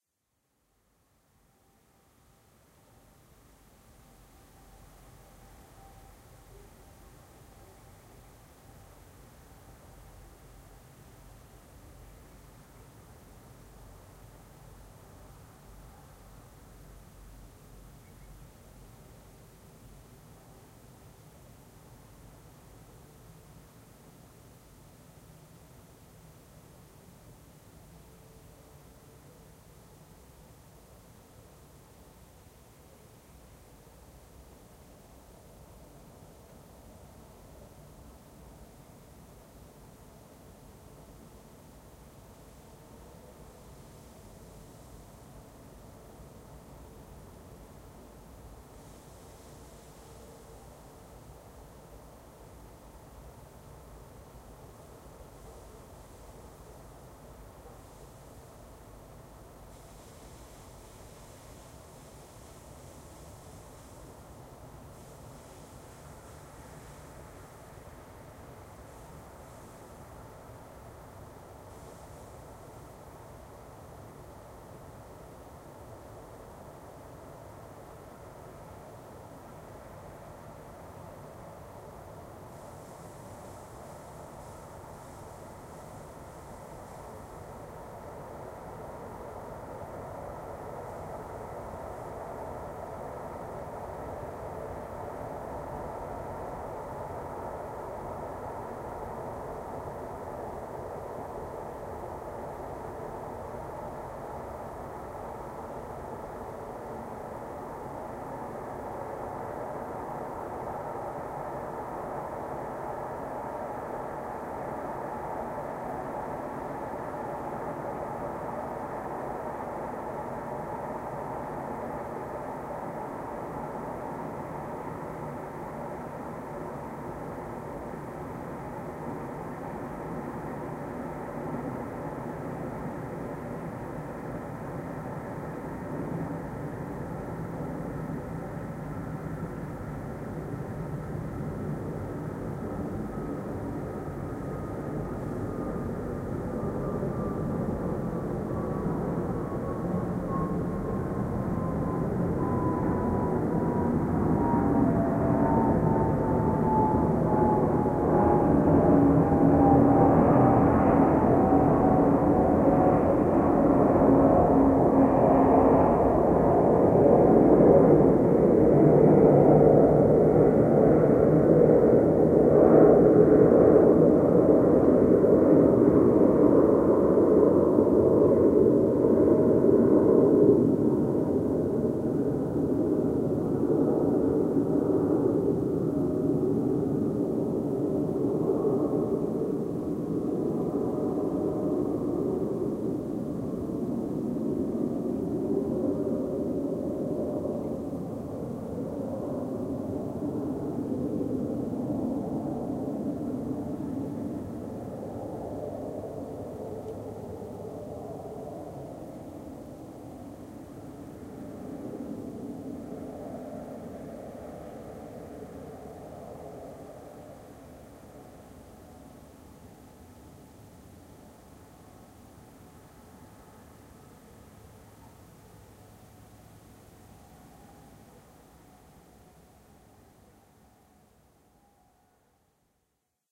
While I was asleep a train and an airplane passed by in the distance. Recorded by my SONY Stereo Dictaphone from the garden.